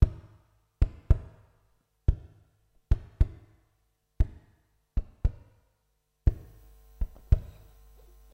Guitar Kick
Kicking on beat 1, 2+ and 3 with my right hand holding all strings on mute with a touch of reverb.
115bpm
EMG-S, EMG, Strings, Elixir, Acvtive, 115bpm, Electric, EMG-89, Guitar, Scalloped, Washburn, Pickups